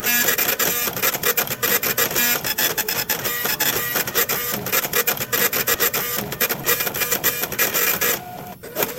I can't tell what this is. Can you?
epson receipt printer5
this epson m188b printer is found in Manchester INternational Airport at a store in Terminal 3. It is printing out a receipt.
This can be used for a receipt printer, a kitchen printer, a ticket printer, a small dot matrix printer or a game score counter.
Recorded on Ethan's Iphone.
electromechanical, machine, receipt, robotic, printer, kitchen-printer, android, business, computer, mechanical, ticker, technology, computer-printer, point-of-sale, electrical, slip-printer, print, printing, dot-matrix, game, robot